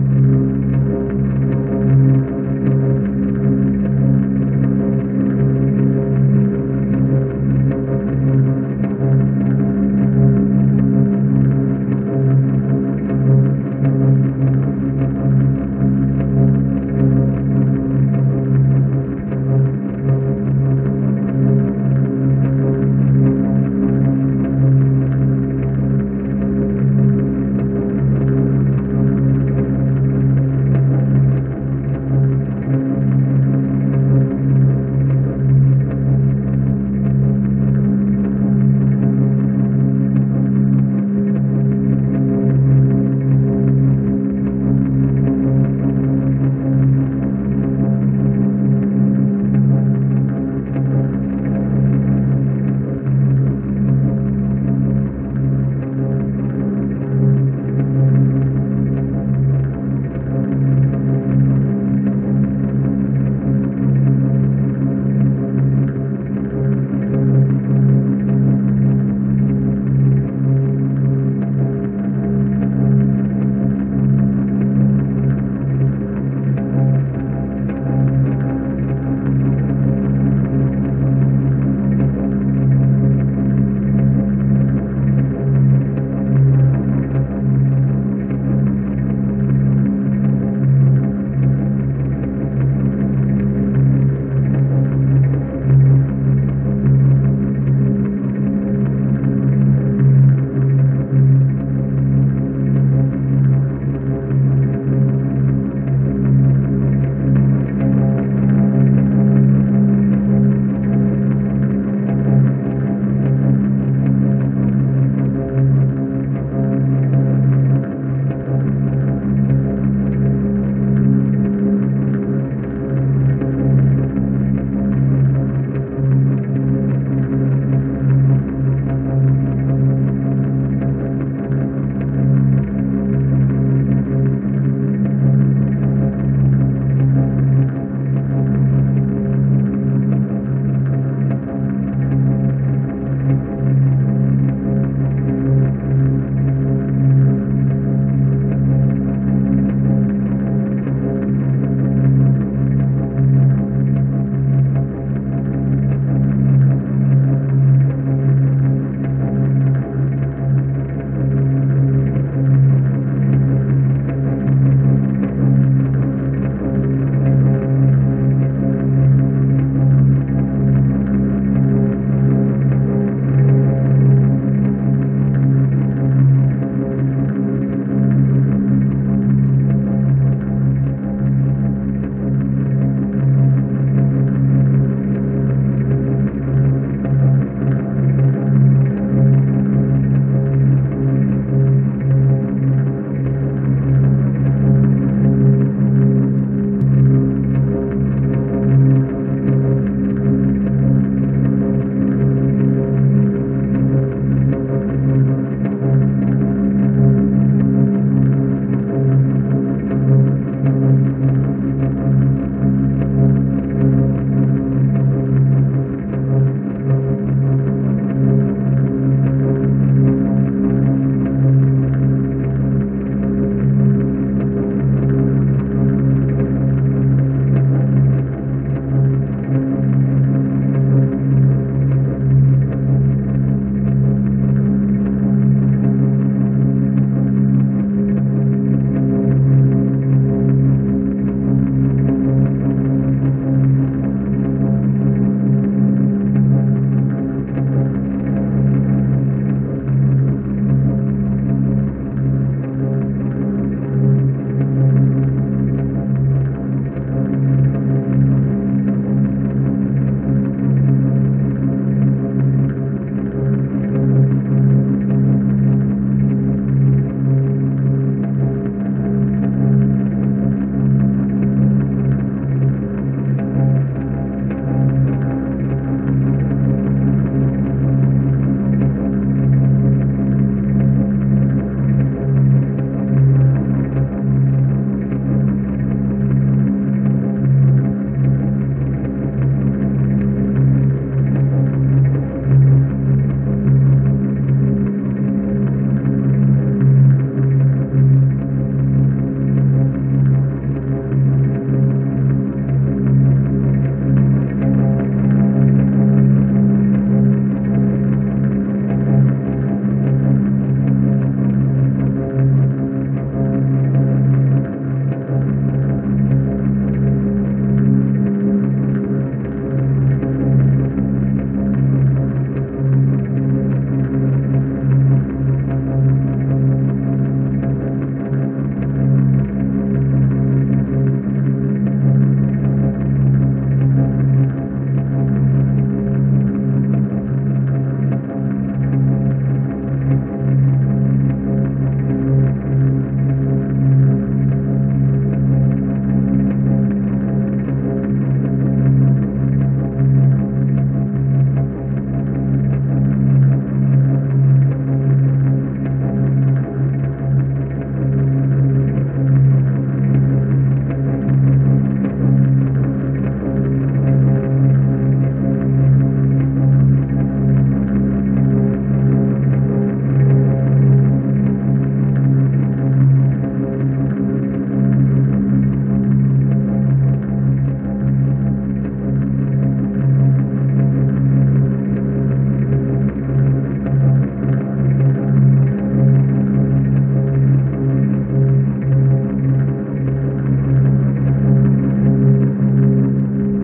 Low ambient
Sound created with electric guitar Phil pro
Effects used in post:
In Guitar Rig 4:
1. Tube compressor
2. AC Box amp
Speed slow down (-0.600)
ambient, atmosphere, background-sound, bass, creepy, drama, dramatic, drone, electric, ghost, Gothic, guitar, haunted, horror, low, phantom, power, scape, scary, sinister, suspense, terrifying, terror, thrill, weird